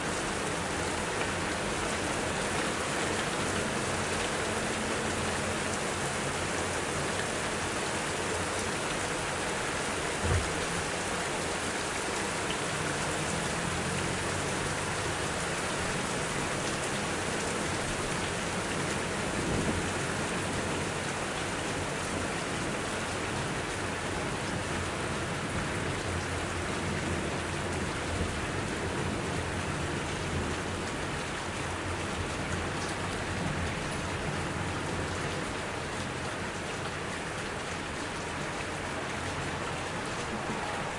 Rain From Window
Recorded with a Zoom H4n onboard microphones, spur of the moment kind of thing. No checking for an optimal recording position, or levels. I just switched it on, opened the window fully and started recording. The batteries were running on empty so I quickly caught as much as I could.
From, Rain